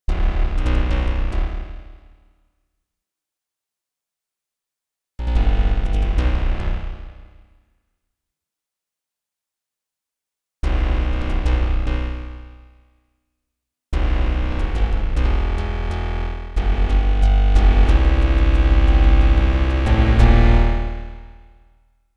reverba-rhythm-bass
synthesized, eerie, bass